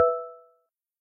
Quick Bing type sound for buttons, etc